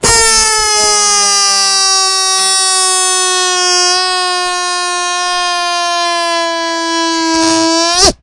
Balloon Expels Air
An extraordinarily entertaining sound - or perhaps the opposite - created by blowing up the entirety of a long balloon (the same used to make the Ploinks) and then letting it release that air. It was recorded as close to the microphone as possible.
ridiculous, deflate, balloon, silly, rubber